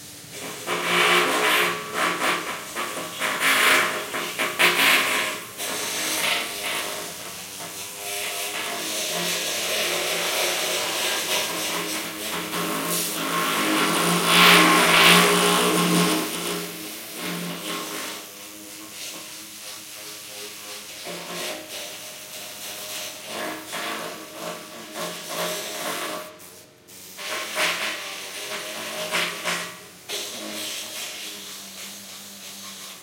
bohren drilling inside pipe
The sounds of drilling inside a metal pipe